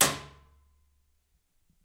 Junk Snare
Snare made of rusty metal scraps
metallic, junk, scrap, drum, snare, drums